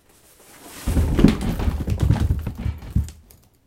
In an attempt to capture the sound of a person falling quite painfully, we tipped a box of shoes down the stairs. Add a cheap condenser mic into the mix and this is what you get. Enjoy!